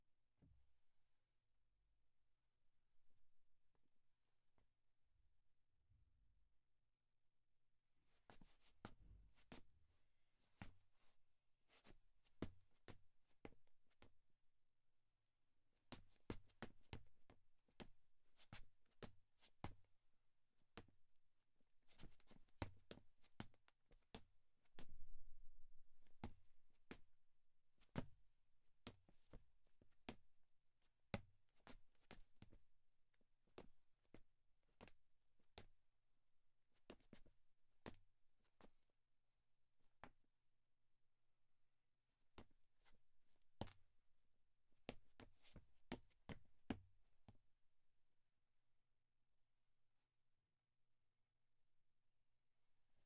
FOOTSTEPS WOOD FLOOR
Some footsteps recorded on a wooden flor, not very hard.